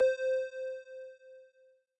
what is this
A correct answer sound tone that I made for a trivia quiz app. The sound was made in Ableton Live 10 using Operator.
If you would like to listen to other variations then, please visit the Gameshow Quiz Sounds and Effects sound pack. Enjoy!